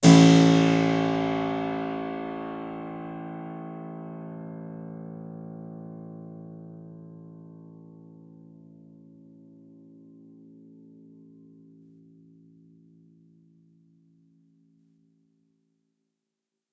Harpsichord recorded with overhead mics
instrument, stereo, Harpsichord